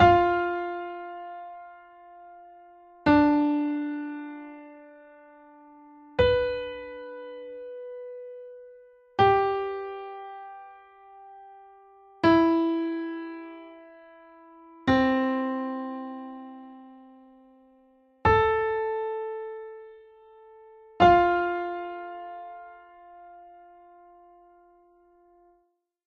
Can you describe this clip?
F Major Lydian
f, lydian, major